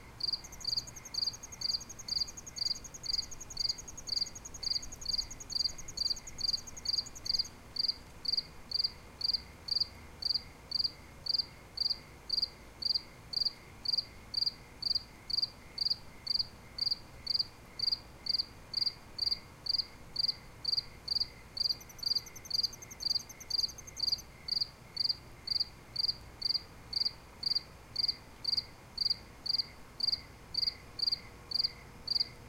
crickets chirping (with other bugs)
night, cricket, crickets, insects, ambience, field-recording, nature, summer, bug, chirp, bugs, insect, zoomh5